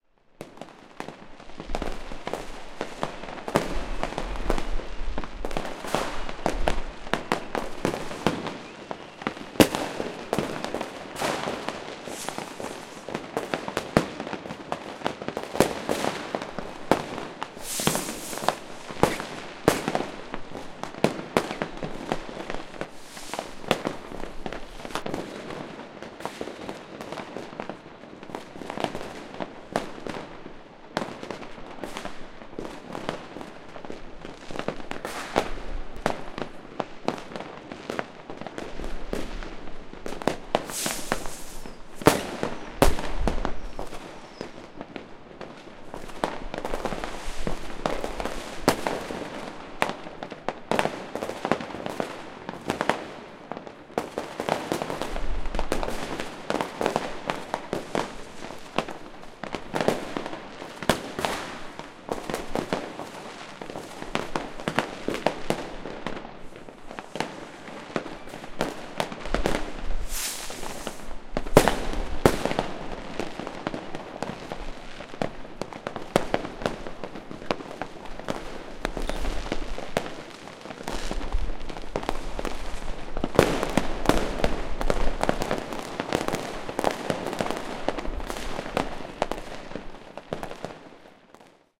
120101-001 fireworks New Year
Fireworks on New Year 2011-2012 at midnight, recorded from my balcony. Different kinds of hissing and exploding rockets and fire-crackers. Zoom H4n